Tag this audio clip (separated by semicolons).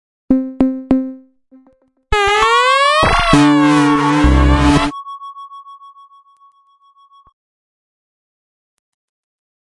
weird; ConstructionKit; dance; sci-fi; electro; rhythmic; loop; 120BPM; electronic